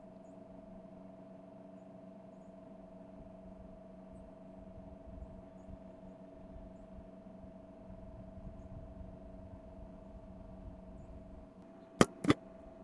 The hum of a vending machine and a button press at the end.